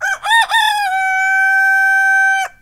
A young rooster crowing
chicken, cock-a-doodle-doo, crow, crowing, rooster